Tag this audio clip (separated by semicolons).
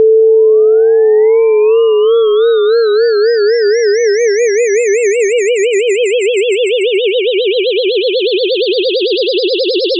multisample; mono; ufo